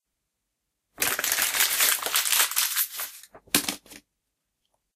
Throwing Paper Away Done
Scrunching up (crushing) a sheet of paper and throwing it into the bin.
Pretty much perfect for an animation
away, crushing, paper, screwing, scrunch, scrunching, sheet, throwing